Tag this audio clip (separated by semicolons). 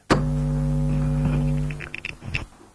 bass; buzz; footage; glitch; noise; tape-recorder